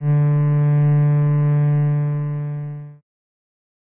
Synth Cello As2
A synthesized cello sound created through AudioSauna. I'm not sure I'll ever find a use for it, so maybe you will. No claims on realism; that is in the eye of the beholder. This is the note A sharp in octave 2.
cello, strings, synth, bowed